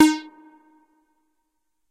MOOG LEAD D#
moog minitaur lead roland space echo
moog, roland, space, lead, minitaur, echo